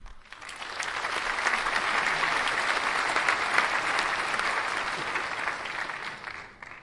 Audiance applauding in concert hall.
Field recording using Zoom H1 recorder.
Location: De Doelen theatre Rotterdam Netherlands
Applause, applaud, applauding, audience, cheer, clap, clapping, claps, concert, crowd, people, public, theatre